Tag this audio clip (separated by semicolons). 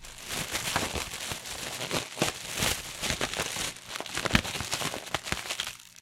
noise; plastic; ruffle